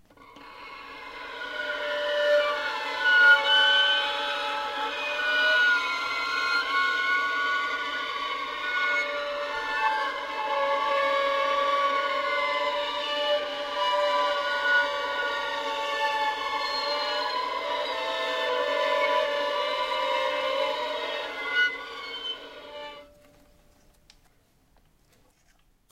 Wailing Violins
Just a me making some noise on a violin, layered a couple of times. Just slap some delay and reverb on it and use it in whatever horror project you got going on!
FX, horror, string, strings, violin